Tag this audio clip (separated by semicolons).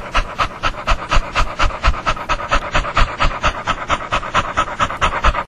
dog; bulldog